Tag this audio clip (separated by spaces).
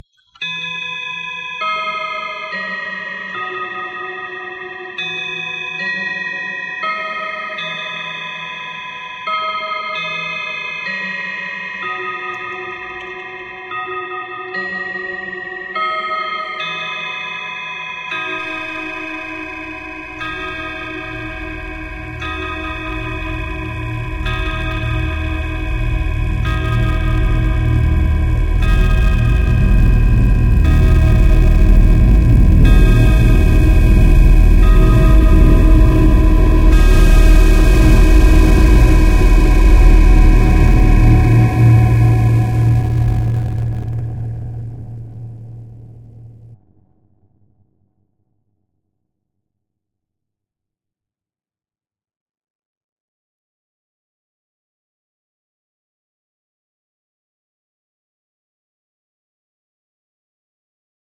19th-sentury antique bell bells clock grandfather-clock sound-poetry